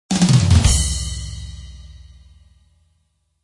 Joke drum fill 08
A short drum fill to mark when a good point has been landed in a joke. Each with a different variation.
Recorded with FL Studio 9,7 beta 10.
Drums by: Toontrack EZDrummer.
Expansion used: "Drumkit from hell".
Mastering: Maximus
Variation 8 of 10
comedy, crowd, drumkit-from-hell, drums, ezdrummer, fills, humor, jokes, laughters